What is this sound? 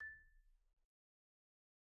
Sample Information:
Instrument: Marimba
Technique: Hit (Standard Mallets)
Dynamic: mf
Note: A6 (MIDI Note 93)
RR Nr.: 1
Mic Pos.: Main/Mids
Sampled hit of a marimba in a concert hall, using a stereo pair of Rode NT1-A's used as mid mics.
hit, idiophone, instrument, mallet, marimba, one-shot, orchestra, organic, percs, percussion, pitched-percussion, sample, wood